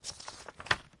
Pickup Note 01
A short clip of paper rustling to mimic the sound of a note being picked up.
pickup, rustling, pages, page, paper, rustle, note